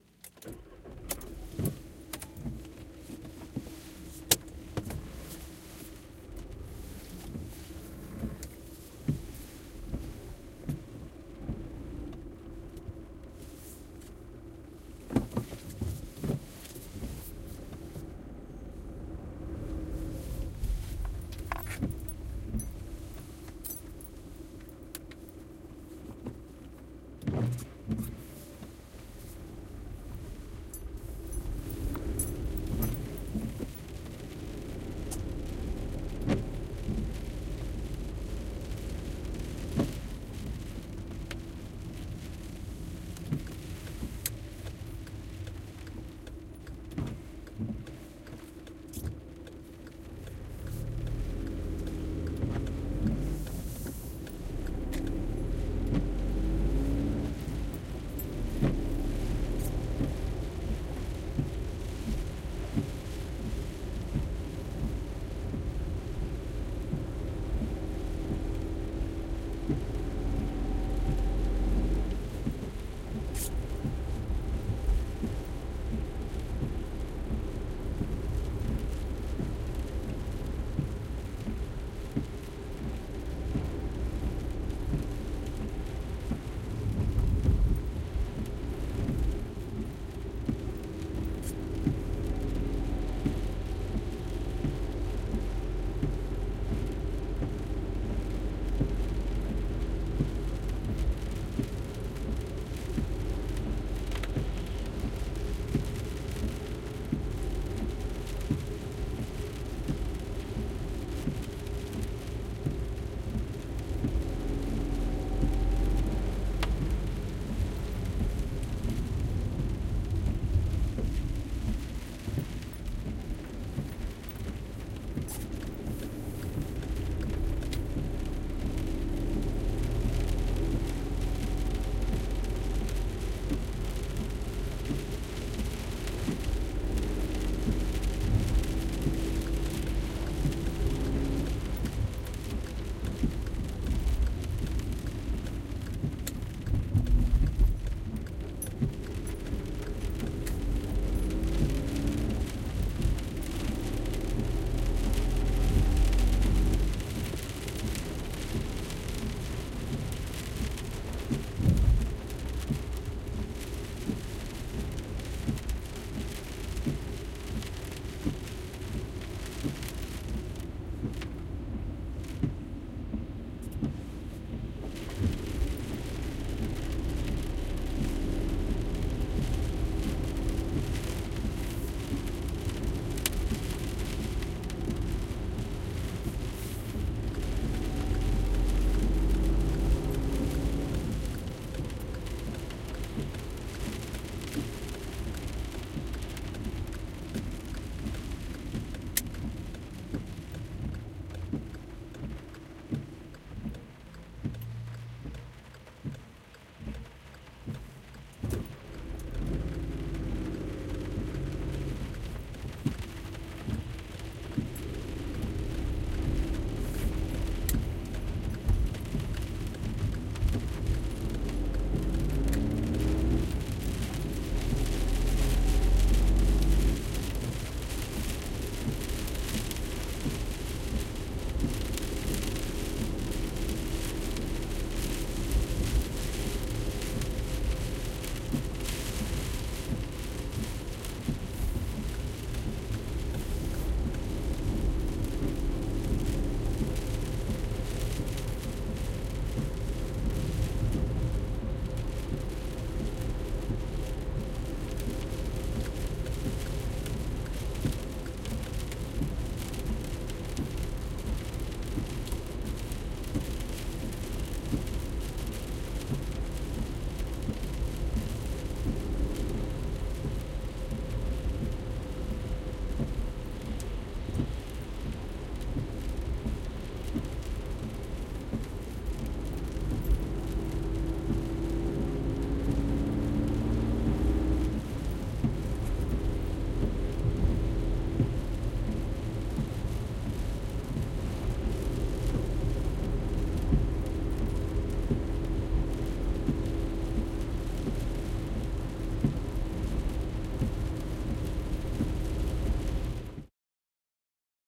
Inside Car - Raining Outside
Sitting inside a car while driving through a small city. It is raining outside. First start of the engine, during the ride the rain is getting more heavier.
Maybe very good for radio play as background.
Recorded with a Zoom H2n (M/S)